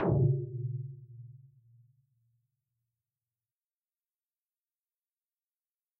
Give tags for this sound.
processed
dare-26

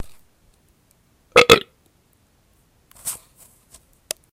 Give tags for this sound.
burp
gas
raw

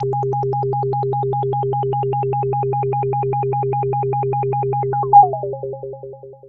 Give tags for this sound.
noise
synth
sequence
synthesizer
wet
hardware
seq
arp
analog
arp2600

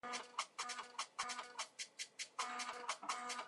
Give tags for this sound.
electromechanics
machine
computer
floppy
floppy-disk
reading-floppy
error

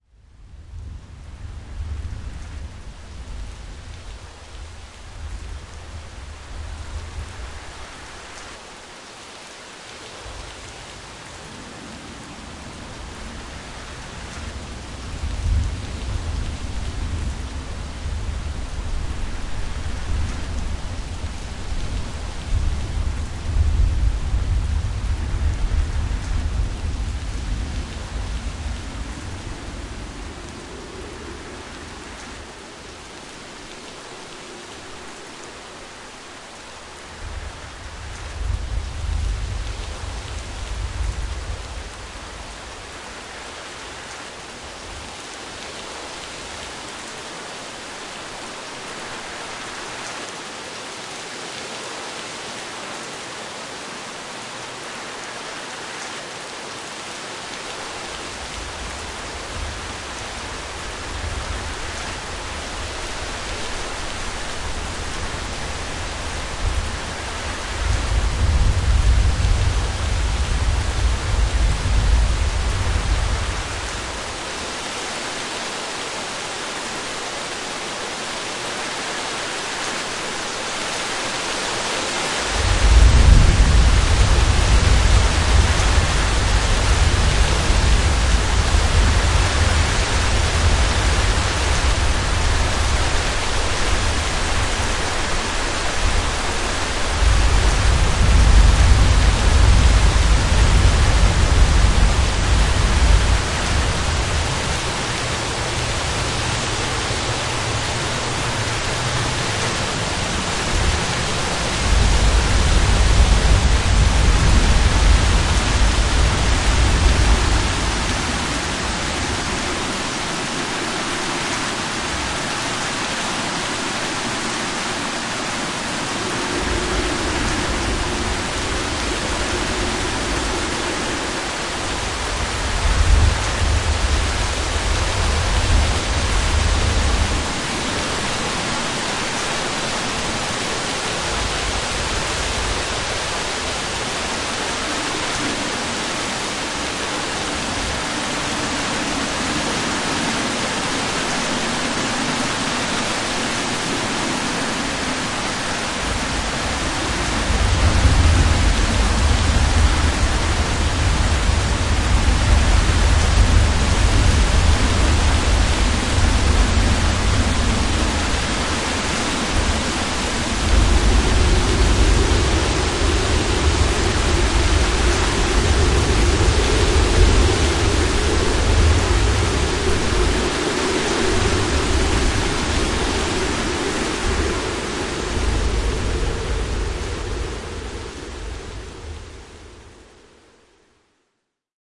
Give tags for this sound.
ambiance
ambience
ambient
kyma
rain
rain-generator
rainstorm
storm
thunder
thunderstorm
wacom

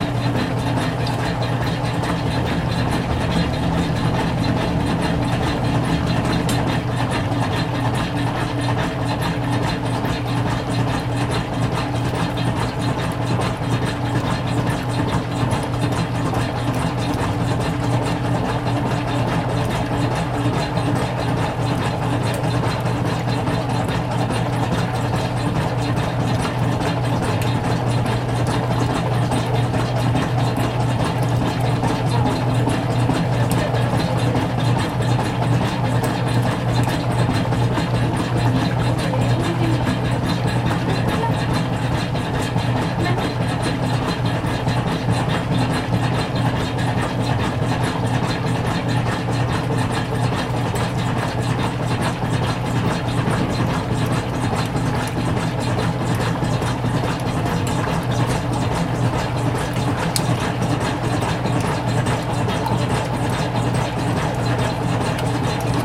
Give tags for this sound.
lavadora
machine
washing

